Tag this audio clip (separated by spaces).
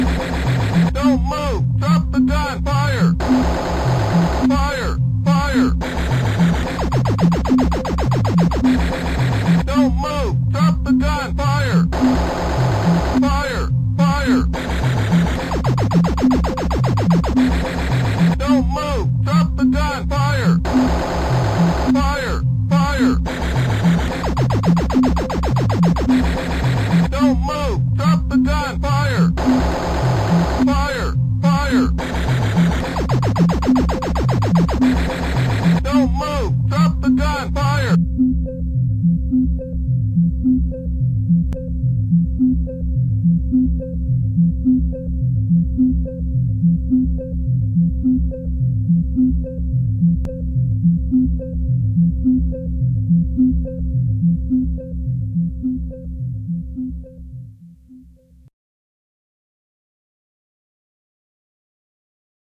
beat mix